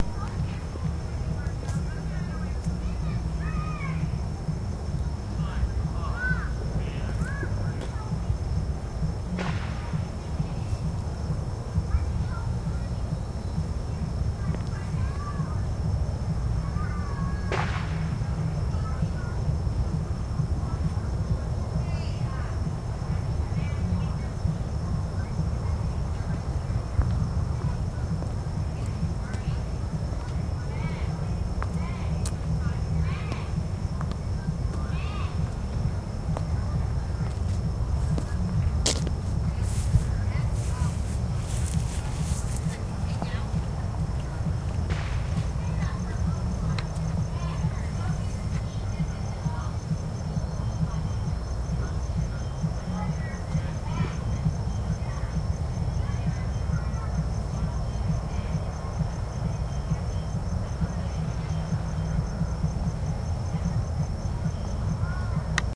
newyears party3
A party in the distance and fireworks and firecrackers recorded with Olympus DS-40 and unedited except to convert them to uploadable format.
fireworks, new, years